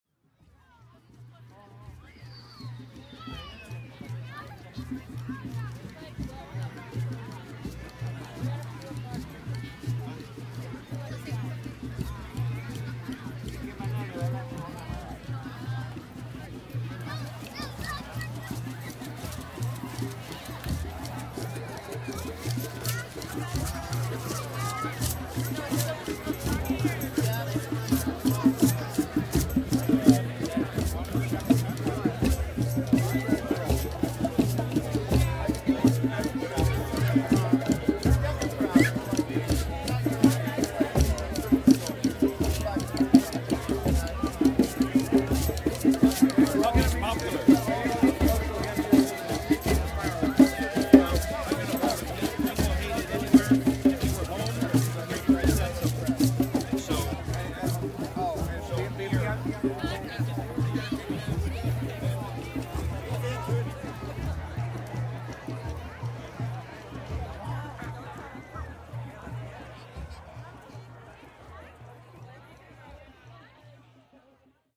Independence Day Drum Circle
Field recording of drum circle Greenbelt MD by the lake on Independence Day 2014 while waiting for fireworks to start. Recording begins with sound of crowd then drums get louder as circle is approached and then recedes to crowd noise as I walk away. Recorded with Hi Q Recorder app on Moto X.
drum-circle, rhythm